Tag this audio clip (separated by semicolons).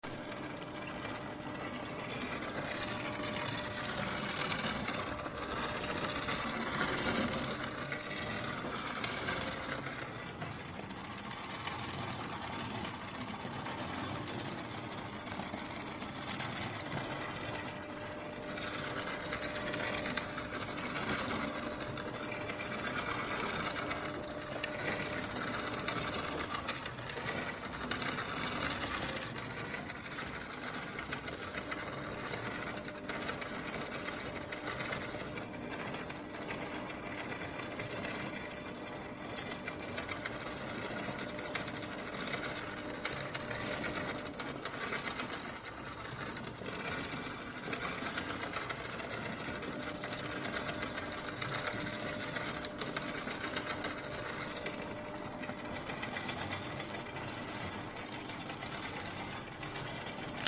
bicycle; chain; clanking; engine; gear; grate; grind